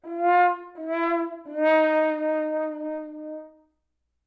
horn fail wahwah 2
A "wah wah" sound produced using the right hand in the bell of a horn. Makes a great comedic effect for "fail" or "you lose" situations. Notes: F4, E4, D#4. Recorded with a Zoom h4n placed about a metre behind the bell.